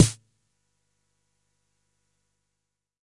various hits 1 027
Snares from a Jomox Xbase09 recorded with a Millenia STT1
jomox, snare, 909